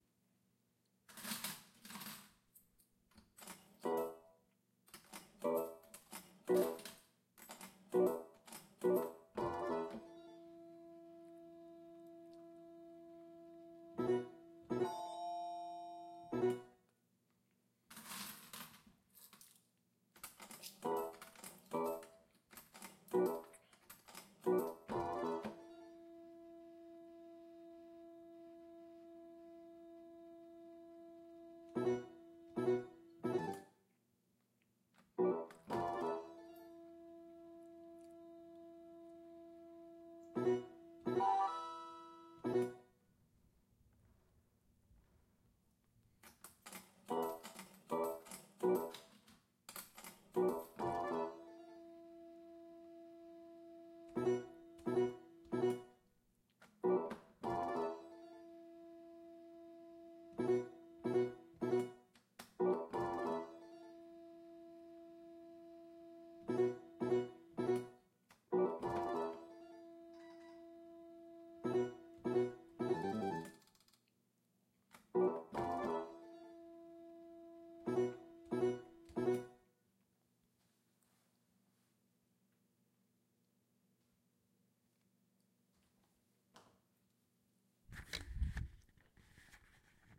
SLOT PLAY NG
General slot play on Japanese Slot Machine. Tascam D-100